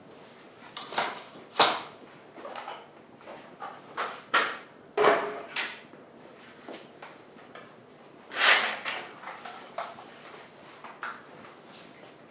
FX - manipular objetos de cocina
kitchen, food